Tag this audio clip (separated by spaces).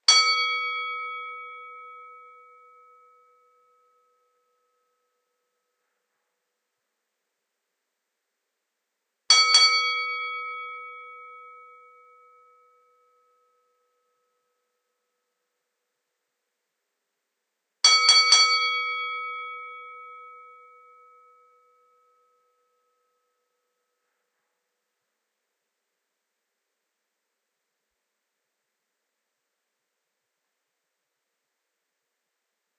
bell box boxing ding effect fight game intros percussion sfx signal sound sound-design sound-effect sport